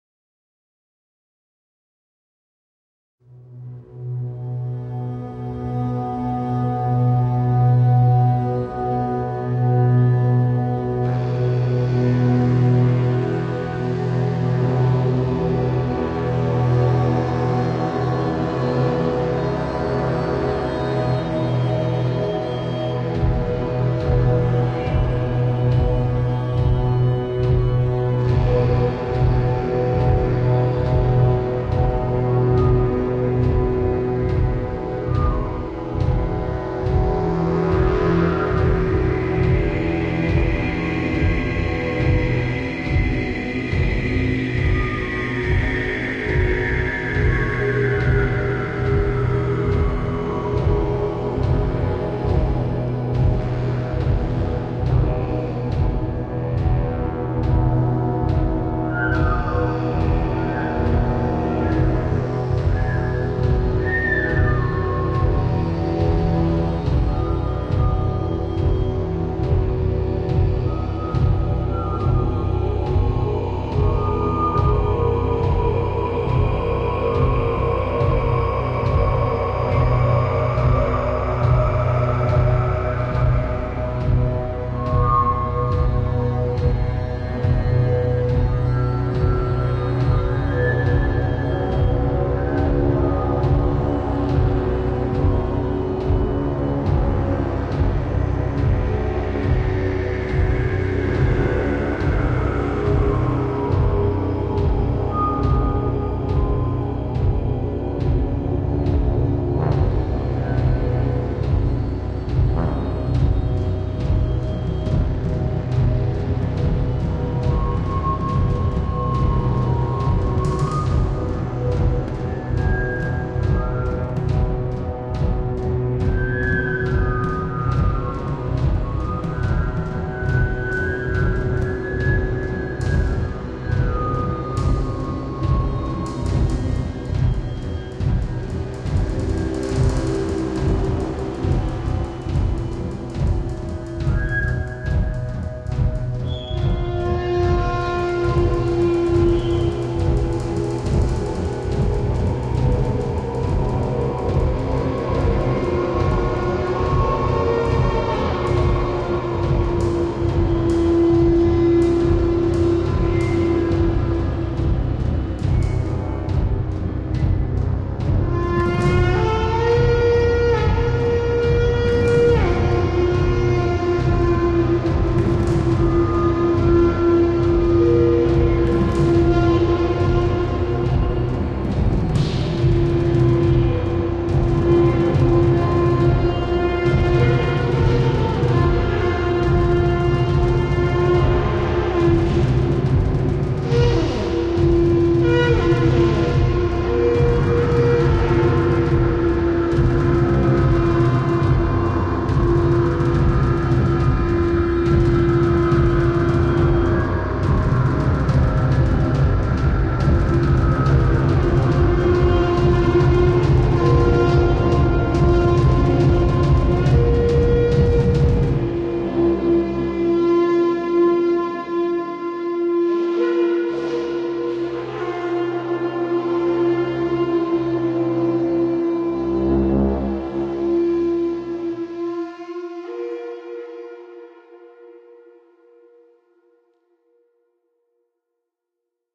Blood Cult
Go nuts, sacrifice a goat xx
Foreboding, Suspenseful, tense, trance, Viking